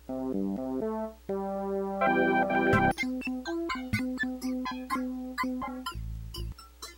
Ah Gee..... These are random samples I recorder and am such a lazy I don't want to sort them out....
1 - Could be my Modified Boss DS-1 Distortion Pedal (I call it the Violent DS - 1) (w/ 3 extra Capacitors and a transistor or two) Going throught it is a Boss DR 550
2 - A yamaha Portasound PSS - 270 which I cut The FM Synth Traces too Via Switch (that was a pain in my ass also!)
3 - A very Scary leap frog kids toy named professor quigly.
4 - A speak and math.......
5 - Sum yamaha thingy I don't know I just call it my Raver Machine...... It looks kinda like a cool t.v.